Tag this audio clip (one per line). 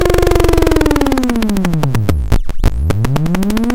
free
hackey
hacky
larry
sac
sack
sample
sine
sound
synthesis